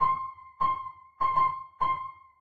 100 Glassy Piano 05
glassy dark piano melody
dark, free, glass, ominus, piano